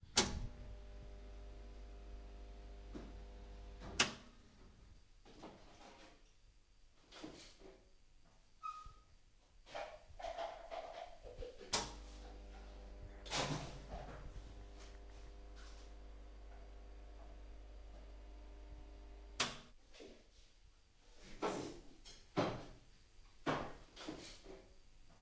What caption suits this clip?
A soundscape created from separate pieces recorded in the ceramics workshop. recorded using an external mic and preamp. Recorded and mixed in audacity